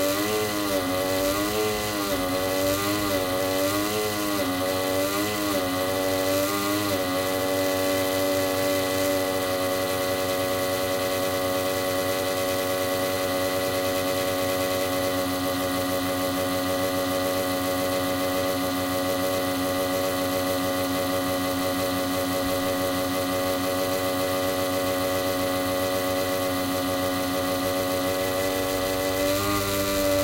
broken pc cooler 01
My broken pc-cooler (not longer in use)
computer, cooler